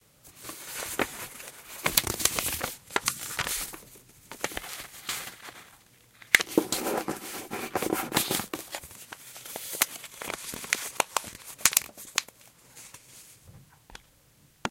Recorded with a black Sony digital IC voice recorder.